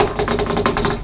This sample has been recorded while knocking on the table (with fingers).
Used Microphone: Soundman OKM - II professional
finger-play, knock, table